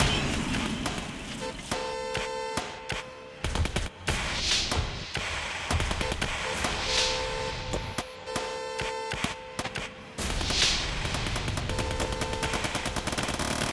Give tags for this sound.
hit synth drums drum

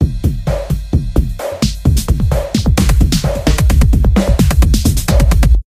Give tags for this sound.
rap RB disko hop sound hip beat loop sample song lied Dj

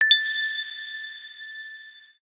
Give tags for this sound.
pick-up coin diamond note game object item

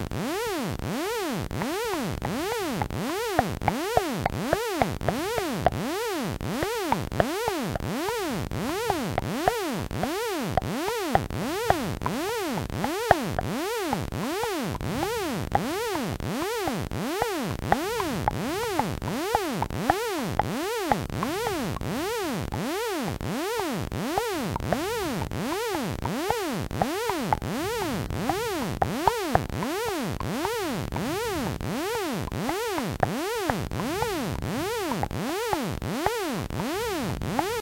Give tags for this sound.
sleeping unknown body

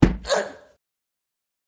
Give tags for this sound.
Voice Female Impact

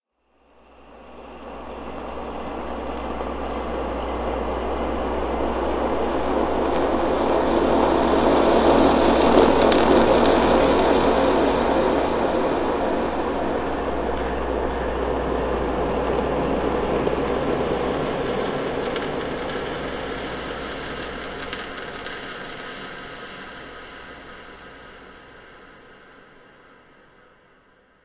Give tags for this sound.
fx dub horror scary reverb

